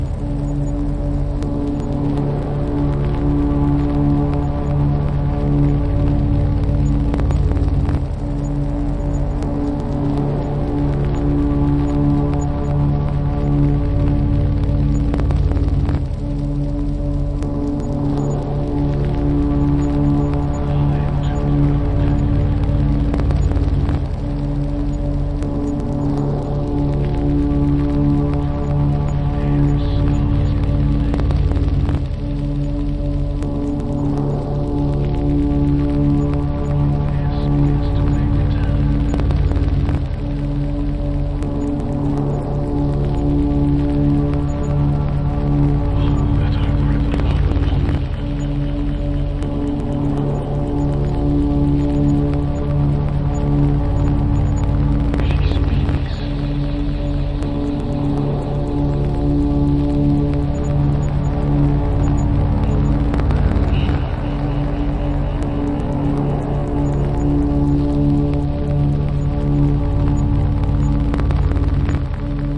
under everything another layer
A combination of vinyl, voice, and synthesizer being processed with a Kaoss Pad.